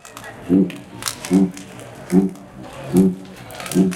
the sound of a zambomba recorded with minidisc in a popular mallorquí day conmemoration in Plça del diamant, Barcelona.
A blaze sound is audible so.
minidic, recorded, sound, zambombas